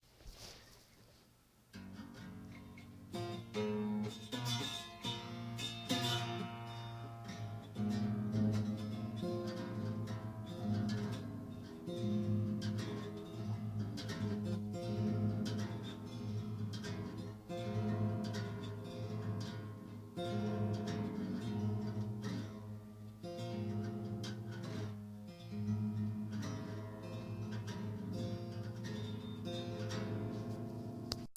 Guitar Strings (4)
acoustics, Strings, Guitar